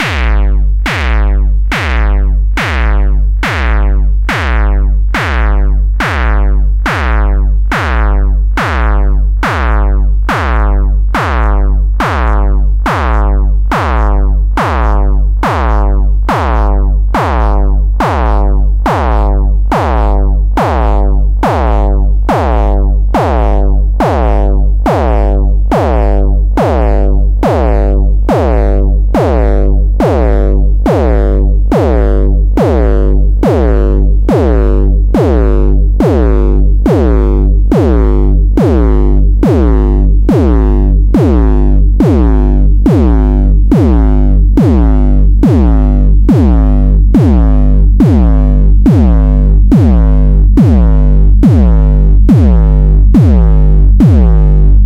A set of 64 distorted kicks with gradually lowered equalizer frequency. This set is more like oldskool hardcore from the later 90's. The kick is only generated with SonicCharge MicroTonic. Good for oldskool hardstyle, nustyle hardstyle, jumpstyle and hardcore.
hardstyle, nustyle, jumpstyle, eq